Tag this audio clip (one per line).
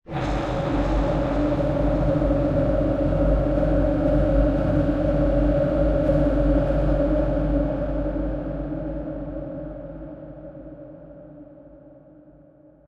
reverb
ambient
dark
experimental
atmosphere